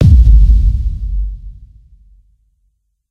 Hard DP02
This is a heavy bass-drum suitable for hard-techno, dark-techno use. It is custom made.
boomer, kickdrum, bassdrum